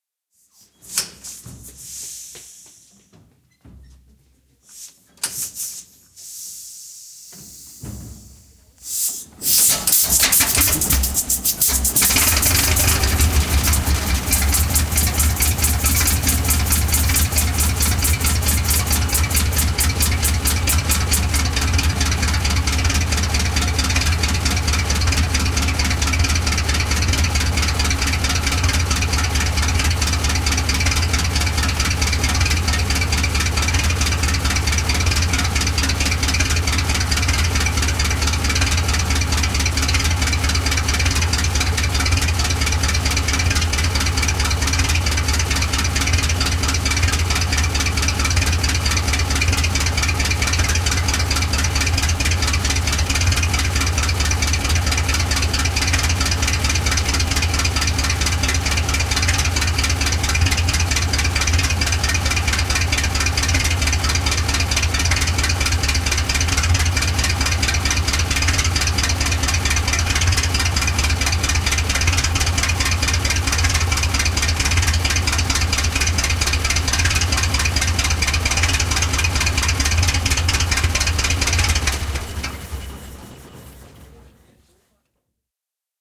Tractor Wallis Cub 1915
Sounds from the engine of a Wallis Cub,
recorded on February, 19th 2015,
at Traktormuseum in Uhldingen at Lake Constance / Germany
Wallis Cub facts:
Year: 1915
Engine: 4 Cylinder, 12919ccm, 44 Horsepower
Weight: 3797kg
(one of only 6 existing machines worldwide)
field-recording, wallis, agriculture, tractor, walliscub, museum, engine, traktormuseum